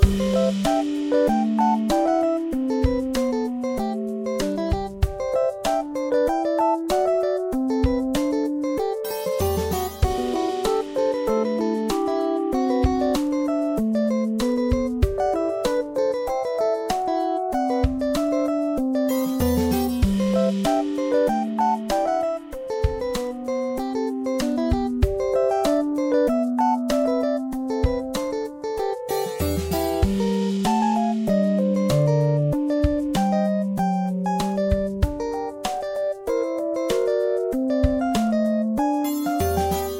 This is a 40-second loop for simple games, created in GarageBand.
digital, game, loop